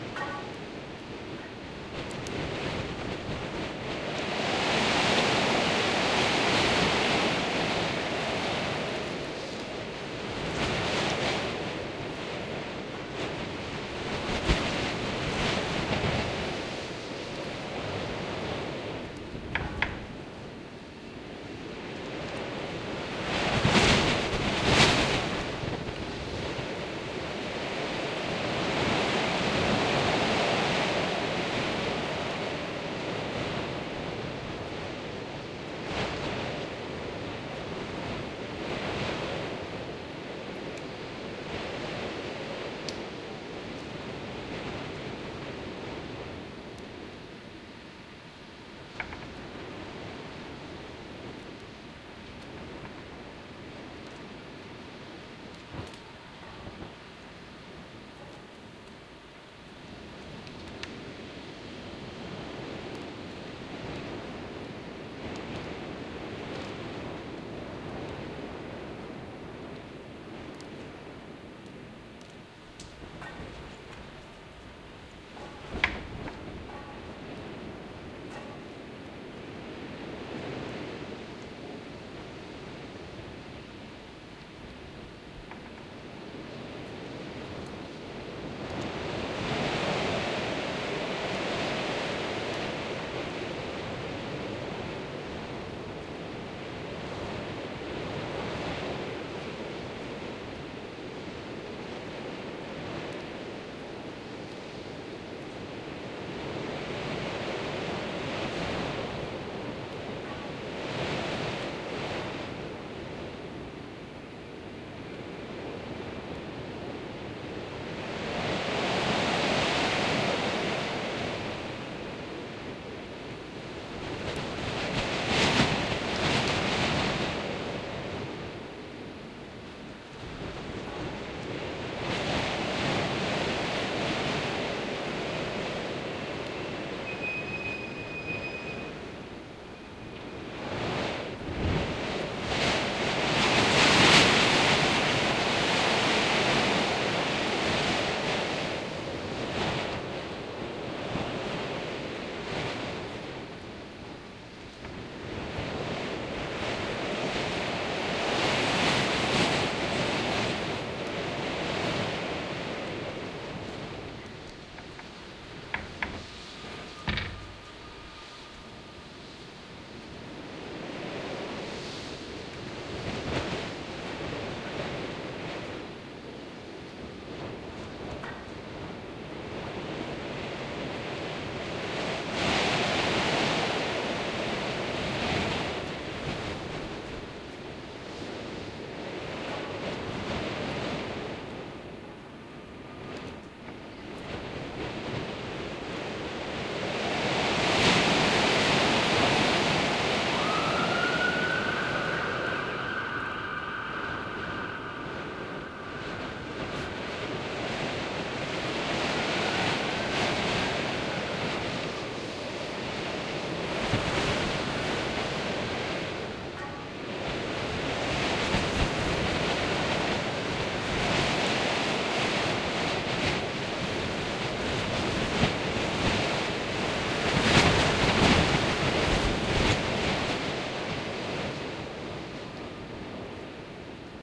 Storm Outside Metal-Framed Window
This is a field recording of a storm howling beyond the large metal window of a five storey building.
wind, window